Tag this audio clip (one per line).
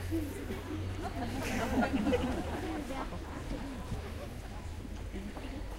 crowd
gathering
laugh
laughing
laughter
outdoor
people